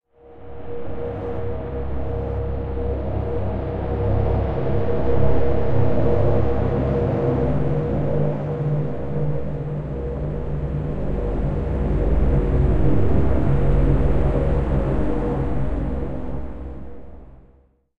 Multi-sampled sound using Ableton Live and Synaptiq effects
Effects, Sample, Texture